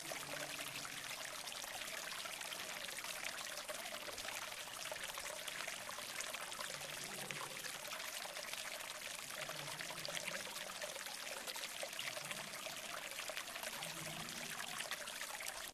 Water river in a deep cave. Repeated loop.